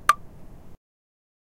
Tractor Ping #3
Play the city. Take objects whose purpose is to reproduce the social in its current configuration and turn them into something else by dint of a new orientation. New means, new methods, no ends; only process and the joy of experimentation.
Recorded with a Tascam Dr100 one cold evening on the Santa Cruz Wharf.
sample-pack, percussion, drum-kits